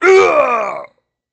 Male Death Scream 1
Male Death scream
dying, screaming